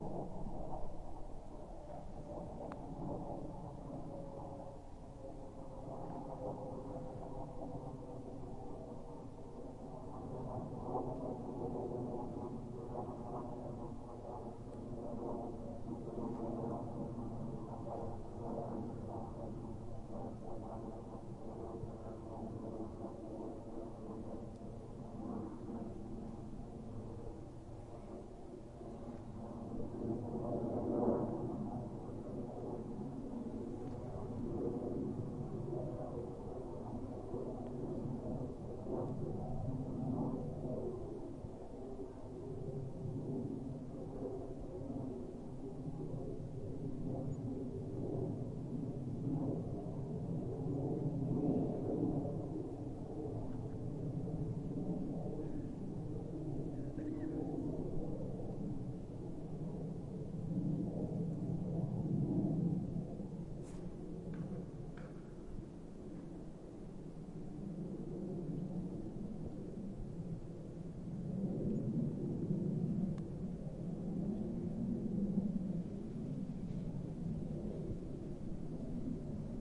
This recording is of a distant plane flying overhead in the valley of Tabernash, Colorado. The snow mixed with the remote location makes the plane the prominent feature. It was made on an H6 using the standard XY capsule.
Plane, Mountains, Field-recording, distant, H6